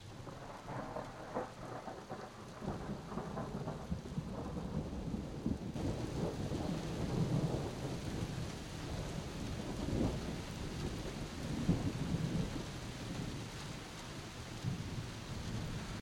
Just some rolling thunder. Fairly steady for some good seconds.
thunder, rolling